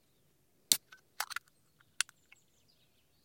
A can of energy drink being opened. Recorded in the Derbyshire countryside an hours walk out of Derby city center.